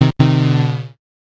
misslyckad bana v3
Korg MS-20 Fanfare modulation pitching downwards. Negative answer.